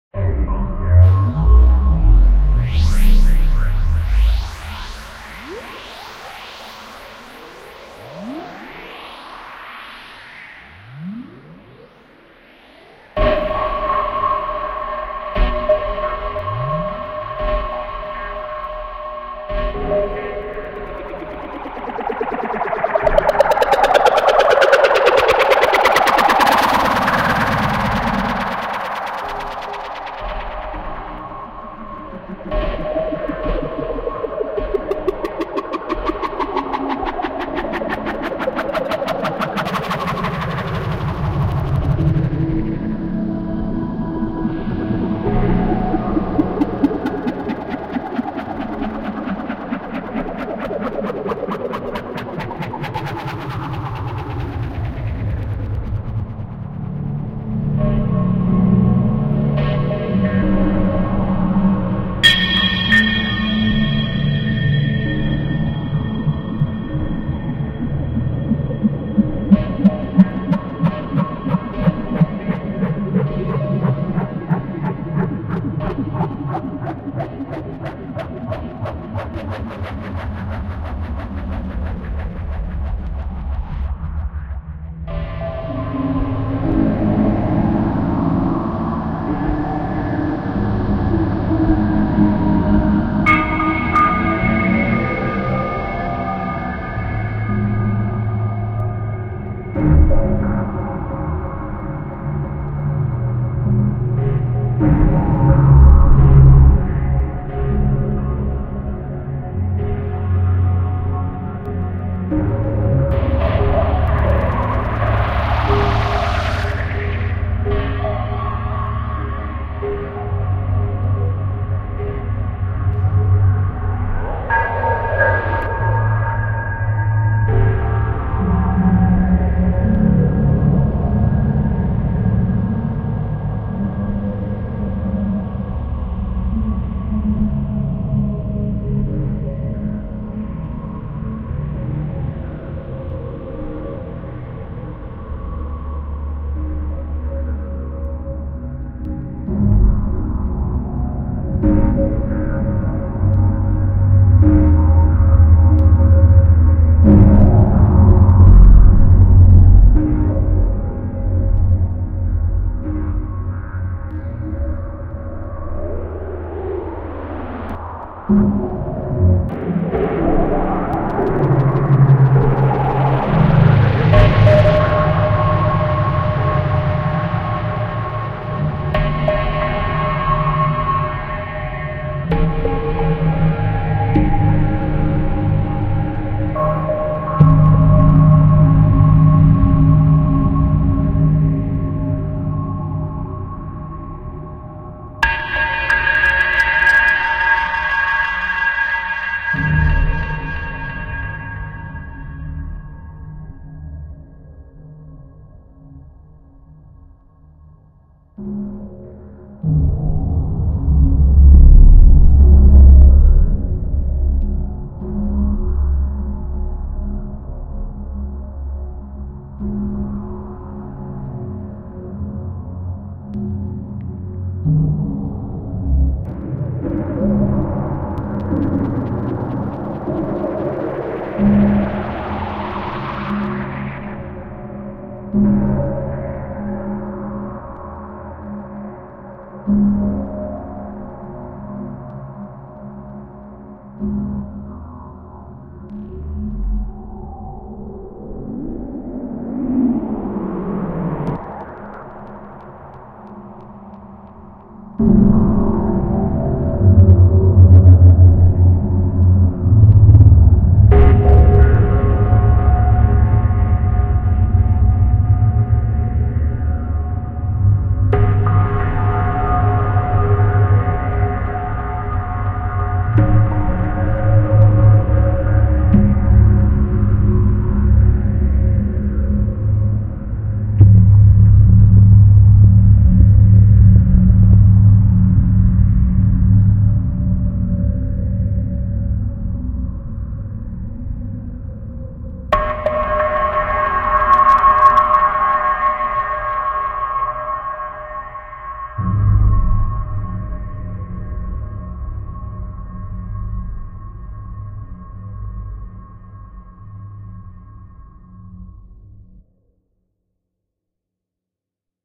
Trippy Drone 2 (Loudness warning)
Made for sampling.
Stretching sounds I've made so far to insanity. Some spots are kind of loud and crackle a bit so mind you're ears.
๐Ÿ…ต๐Ÿ† ๐Ÿ…ด๐Ÿ…ด๐Ÿ†‚๐Ÿ…พ๐Ÿ†„๐Ÿ…ฝ๐Ÿ…ณ.๐Ÿ…พ๐Ÿ† ๐Ÿ…ถ
Drone, Ghost, Horror, paranormal, scared, Scary, Suspense, thrill, Thriller, zombie, zombies